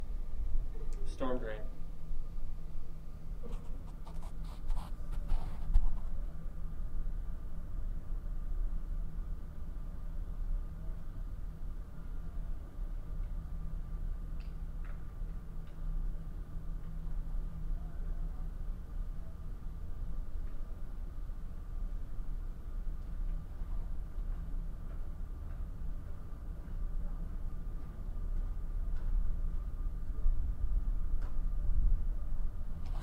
storm drain
ambient, mono